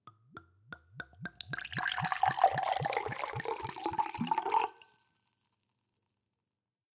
pouring in some wine